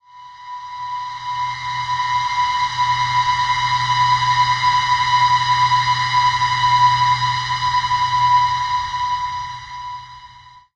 Dramatic Tension
Pad sound, great for use as a tension builder.
ambient, dark, dirge, drama, pad, soundscape, tension